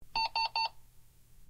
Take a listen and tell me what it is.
These are sounds recorded from a printer.
beep, copy, machine, mechanical, noise, printer, scanner, sound